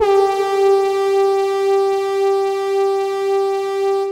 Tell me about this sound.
A brass horn with a little reverb
air, brass, effect, fx, horn, sound